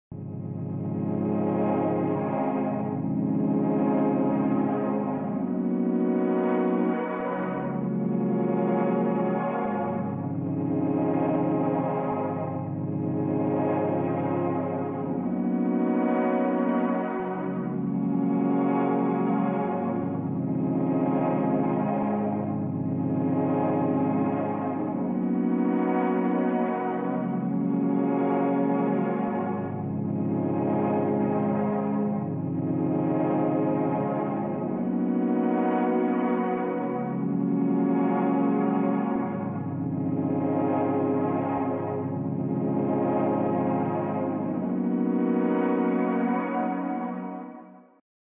Surreal Synth
Processed Synth made From a bunch of Saw waves in Operator.
Chill, Ambient, Synthesizer, Chillwave, Electronic, Major, Ambience, C, Synth, Free, Processed, Dreamy, Ableton, Operator, Atmosphere